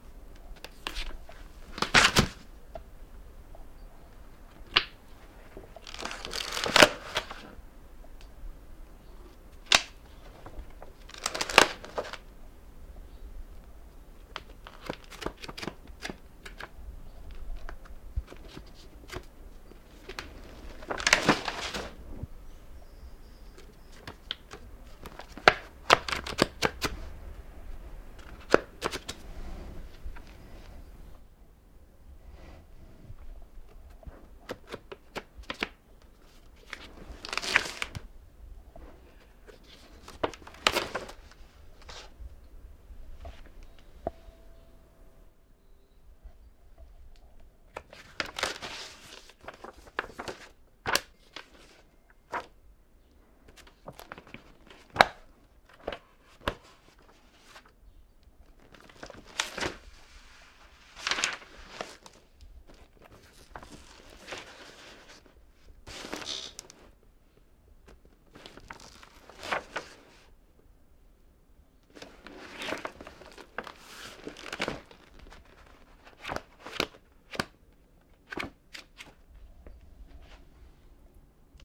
Turning book pages: The sound of someone loudly turning pages over in a large A3 book, loud sounds. This sound consists of several one shot variations in one take. This sound was recorded with a ZOOM H6 recorder and a RODE NTG-2 Shotgun mic. Post-processing was added in the form of a compressor in order to attenuate some of the sound's transients that caused clipping, while still keeping the rest of the sound's levels audible and vibrant. This sound was recorded by someone loudly turning a large book's pages over in a small room, while being recorded with a shotgun mic.